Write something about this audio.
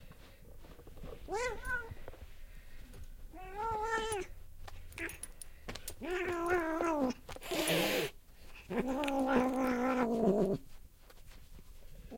angry cat
don't worry she wasn't bothered too much to get this, my two cats just don't always get along very well
cat, growl, hiss, growling, hissing, animal, pet, feline, cats, cat-hissing, pets